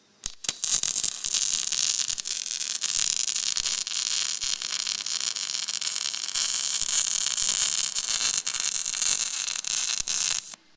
The sounds of welding
factory, field-recording, industrial, industry, machinery, welding